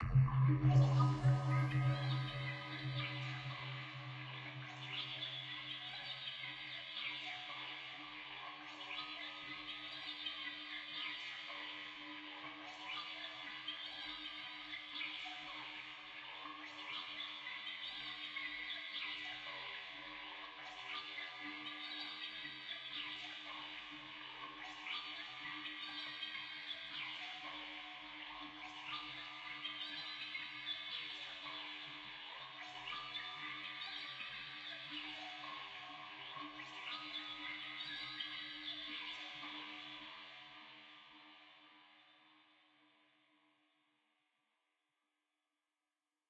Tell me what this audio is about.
Result of a Tone2 Firebird session with several Reverbs.
atmosphere, dark, reverb, experimental, ambient, sci-fi